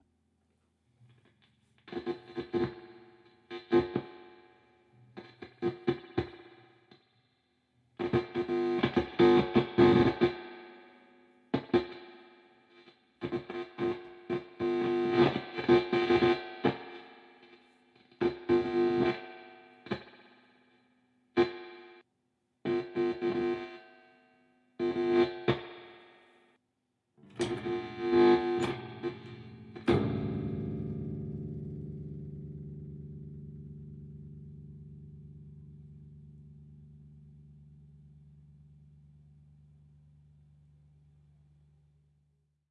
Buzz basse électrique ampli
electric, amp, bass, buzzing